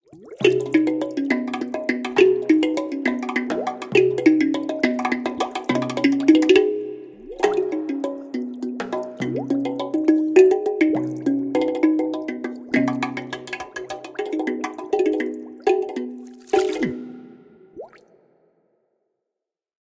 Creative Sounddesigns and Soundscapes made of my own Samples.
Sounds were manipulated and combined in very different ways.
Enjoy :)
Water, Wet, Serene, Playful, Dripping, Mermaid, Slit-Drum, Percussive, Pentatonic, Melody, Childish